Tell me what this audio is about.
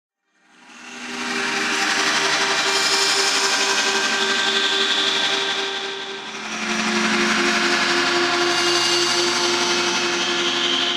liquid ghost
ghostly sounding ambient synth
ambient, ghostly, liquid, soft, synth